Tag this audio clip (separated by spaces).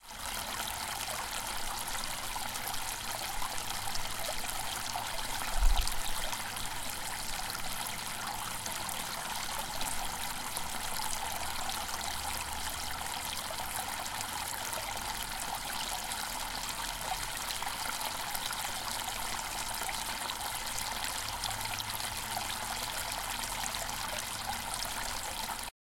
design water nature field-recording dream sound Foley